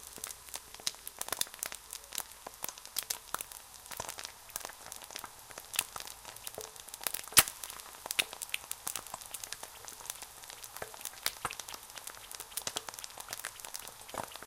Frying an egg. Recorded using a Rode NT4 into a Sony PCM D50.